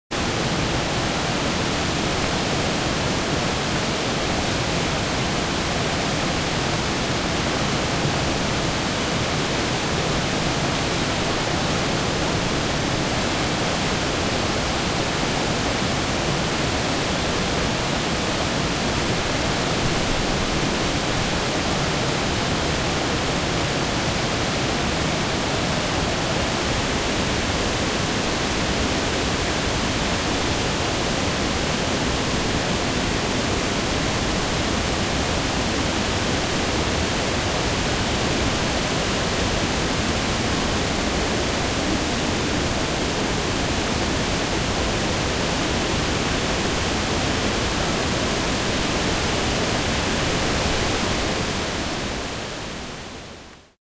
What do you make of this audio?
Big waterfall 2 at Krka falls
A big waterfall at Krka falls.
ambience, falls, field-recording, krka, water, waterfall